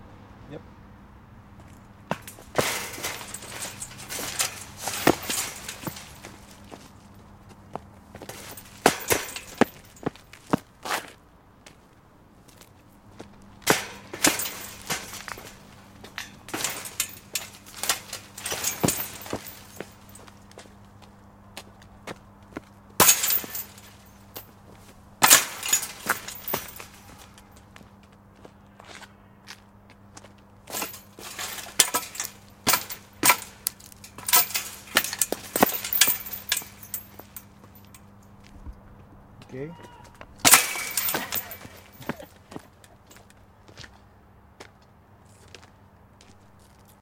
Jumping over metal fence
Jumping over a metal fence with footstep sounds